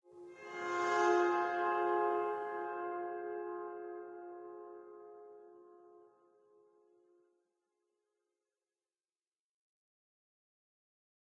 Bowed electic guitar - Fm9 chord
Electric guitar played with a violin bow playing a Fm9 chord
spacey, bowed, string, guitar, bow, electric, violin, reverb, orchestral